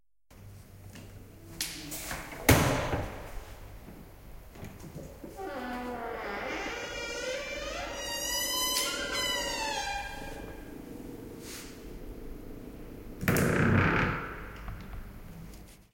An old creaky which I opened and closed creakily.